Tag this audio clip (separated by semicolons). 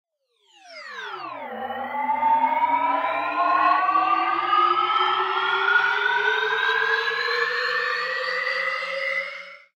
electronic; processed; synthesized; ableton; elevating; sweep-by; riser